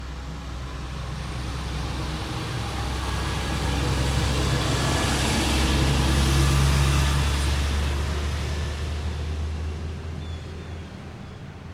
Log Truck Pass
A log truck drives by on a country highway shortly after a light rain, a slight breeze rustles leaves in the trees. Recorded with an SM-57.
country-highway, drive-by, log-truck